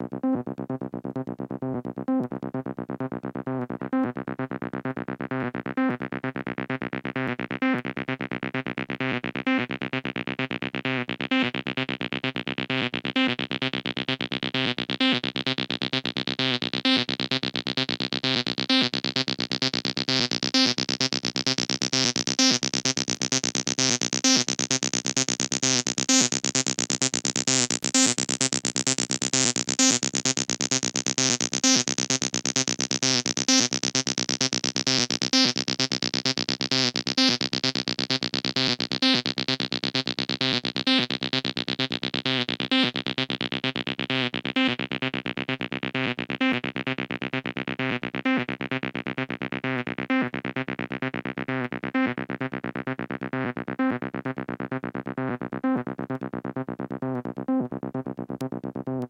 TB303 Made with Acid machine 130BPM